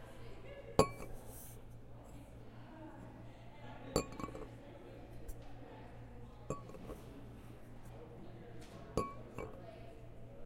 Metal water bottle set down
bottle, down, Metal, set, water